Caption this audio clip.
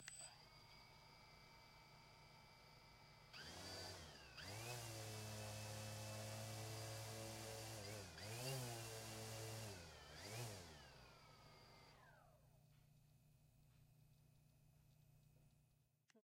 My brother revving his model helicopter up and down for our recording amusement.